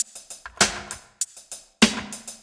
Drumloop 03 99bpm
Roots onedrop Jungle Reggae Rasta
Rasta, Roots, Reggae, onedrop, Jungle